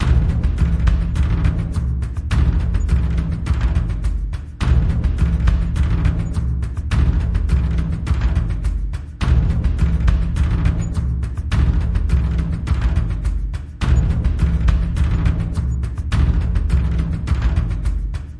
Tribal beat, tools MPC4000
beat, drum-loop, percs, percussion-loop, quantized, Tribal